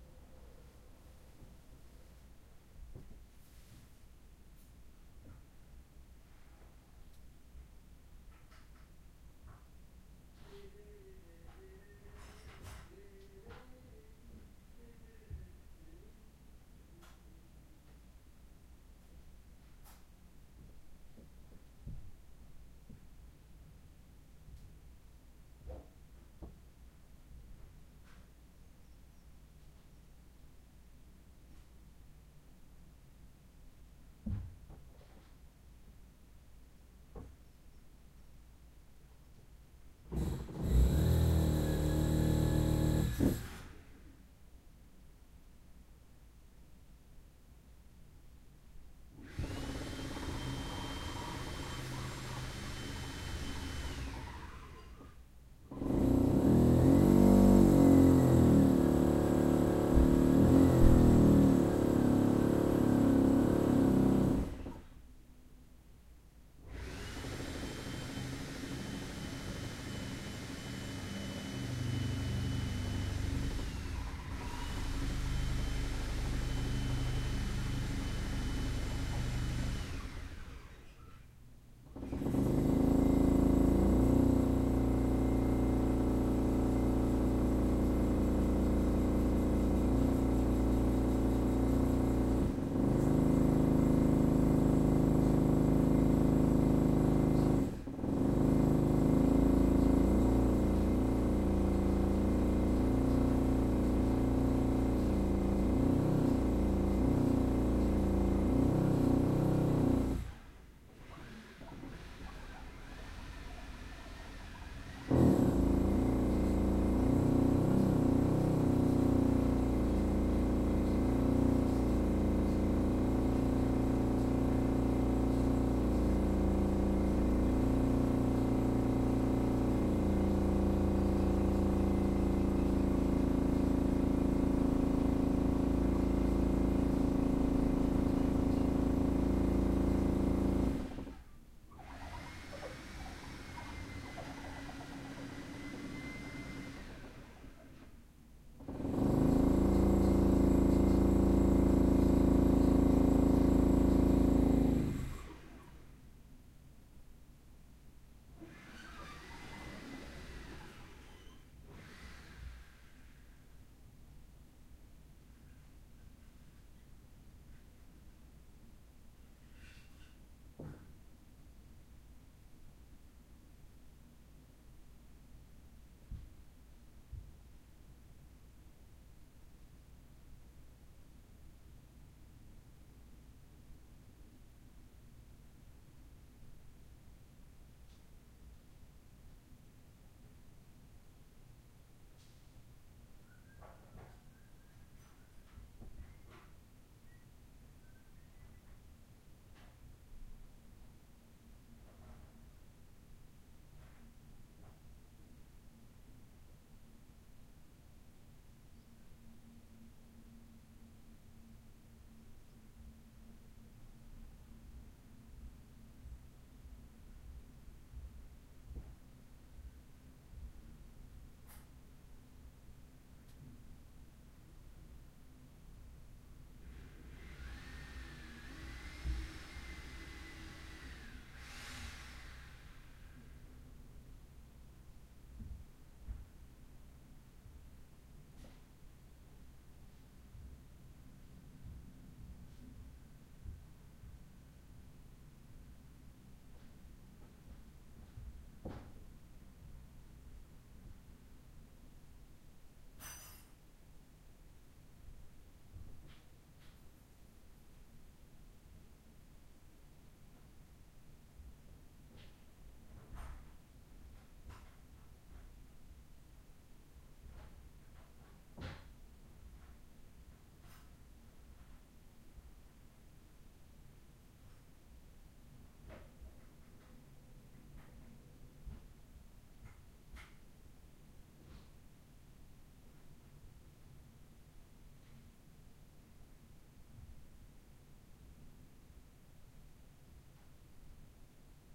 Had some electrical work done. The electrician needed to hack a bit of the wall to do the installation.
At the start of this recording the electrician is humming. Setting up his tools to drill the wall.
Heavy duty drillling. Then you can hear the electrician walking about and moving small tools/pieces.
Recorded with a Zoom H1 from the next room.